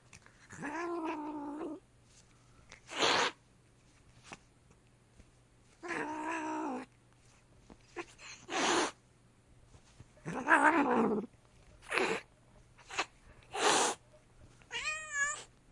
Cat fighting sounds (clean)
Recording I took of my cat fighting with me, recorded on a Tascam DR-07, edited through Audacity.
breathing, hiss, animal, Cat